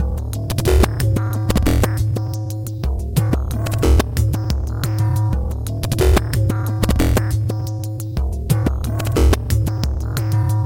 lo-fi idm 5 glitch
beat distrutti e riassemblati , degradazioni lo-fi - destroyed and reassembled beats, lo-fi degradations
hop, g2, clavia, idm